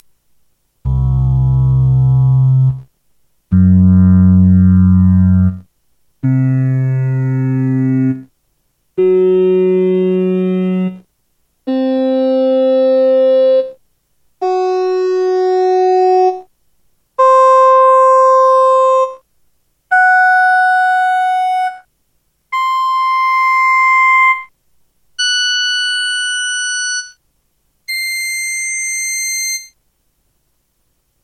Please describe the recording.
A multisample of the sound name "Organ 2" from vintage electronic keyboard Vermona SK-86. Two notes from each octave were sampled (C and F#) which should be sufficient for successful re-creation of the original sound on your sampler.